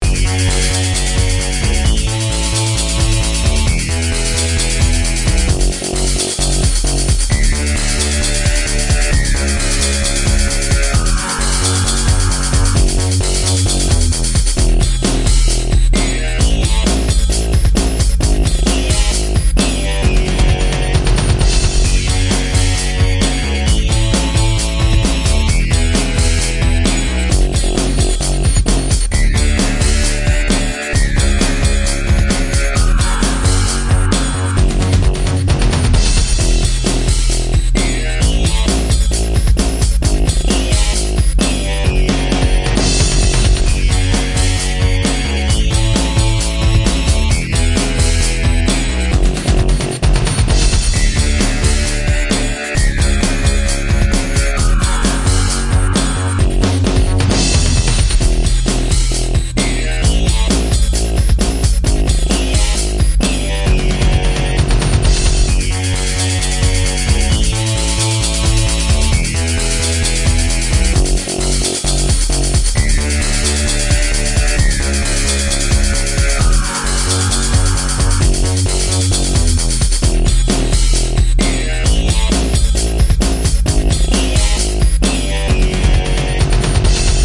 Original Electronic Loop at 132 BPM key of F Sharp Minor.